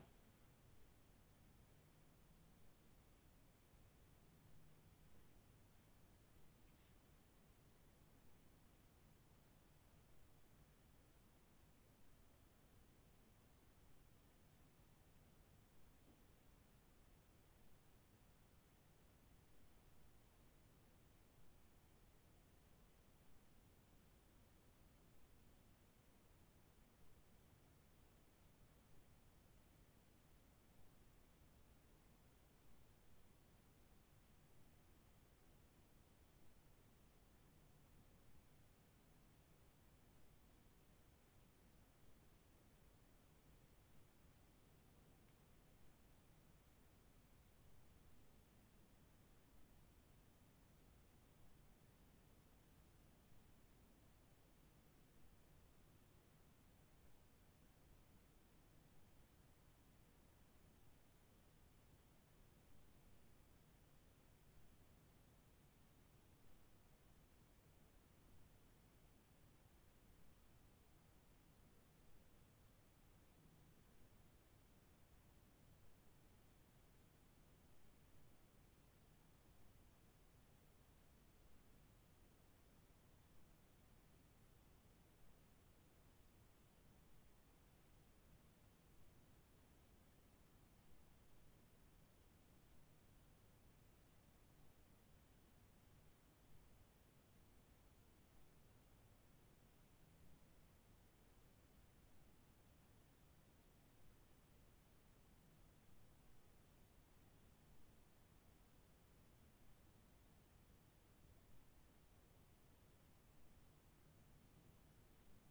Room tone for the Footsteps soil sound pack.